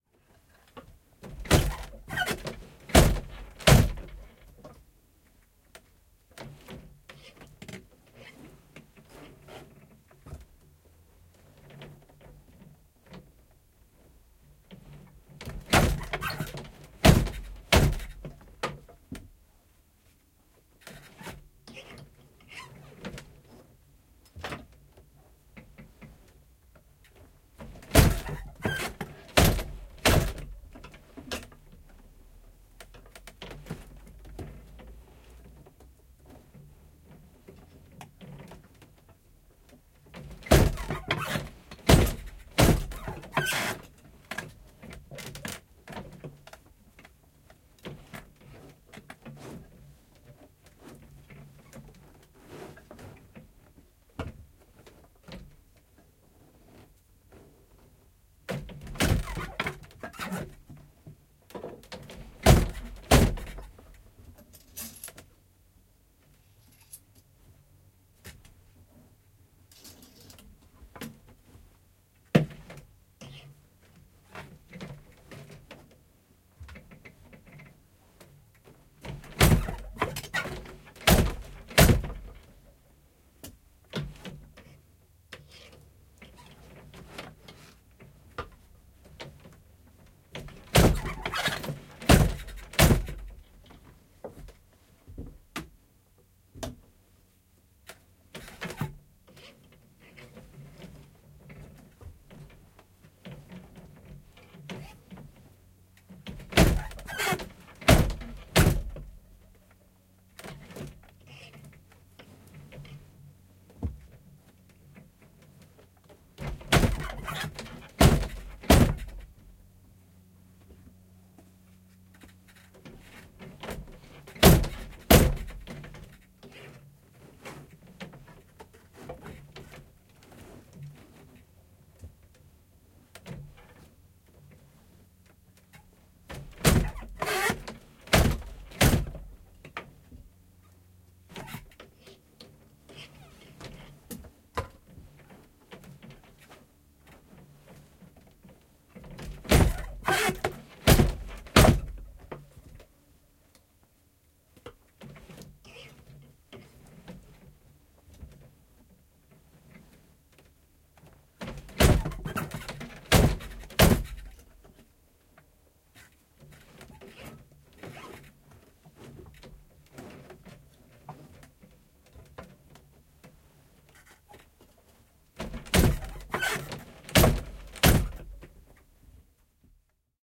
Kangaspuut / Old wooden loom from 1857, weaving a rag rug
Vanhat puiset kangaspuut, v. 1857. Kudotaan räsymattoa.
Paikka/Place: Suomi / Finland / Vihti, Ojakkala
Aika/Date: 11.08.1987
Field-Recording, Weaving, Matto, Weave, Soundfx, Suomi, Kangaspuut, Kutoa, Kutominen, Finnish-Broadcasting-Company, Matonkudonta, 1900th, Finland, Rag-rug, Rug, Loom, Tehosteet, Yle, 1800-luku, Yleisradio